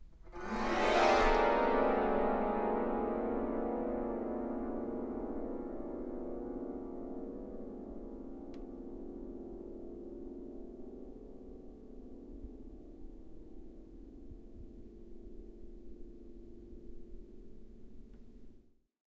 Scary piano sound 3 (more treble)
A recording of me dragging my fingernails on the naked strings of my piano while holding down the sustain pedal. Classic scary effect. In this recording, I'm only pulling on the treble strings.
piano, creepy, atmospheric, horror, detuned, atmosphere, pedal, string, fear, dark, Scary, sustain